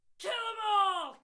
Battle Cry 3
Microphone Used: SM58
DAW Used: Reaper
Objects Used: Simply Recorded a friend of mine shouting into the microphone, microphone used popshield and used limiter and compression to avoid peaks
Battle, vocals, voice, Male, Shout, Scream, english, Cry